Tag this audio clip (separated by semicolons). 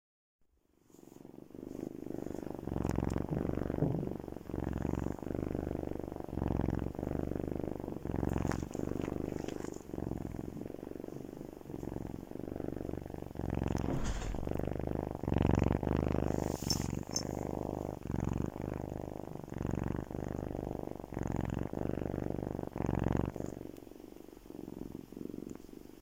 purr; pet; purring; cat